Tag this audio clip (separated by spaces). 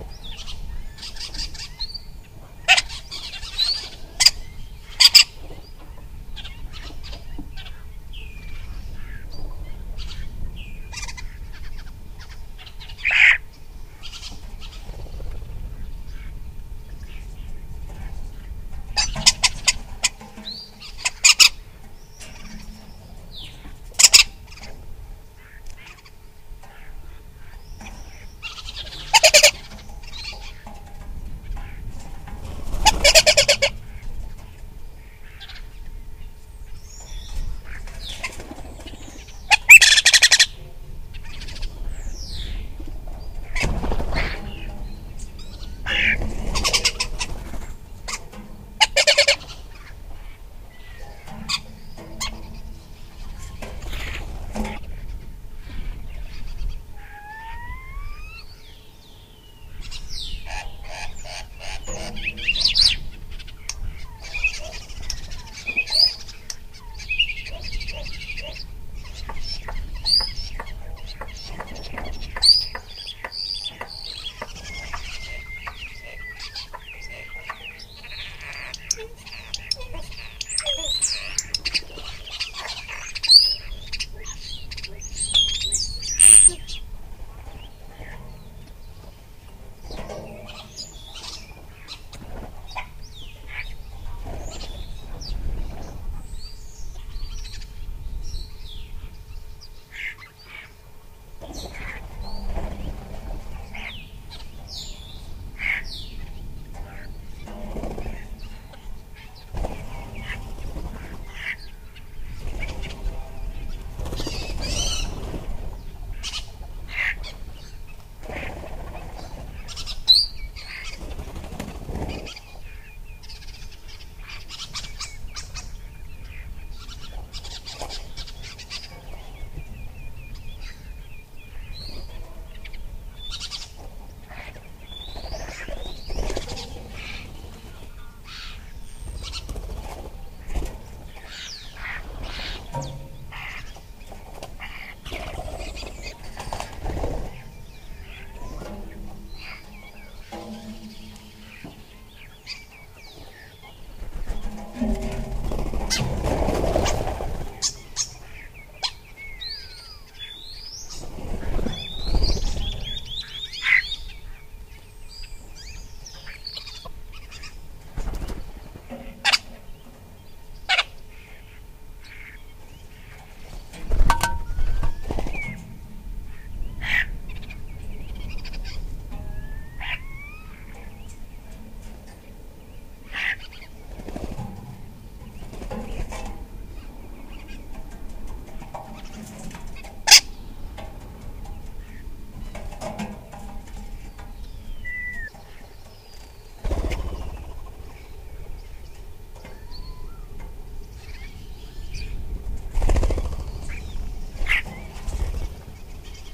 birds field-recording donana nature summer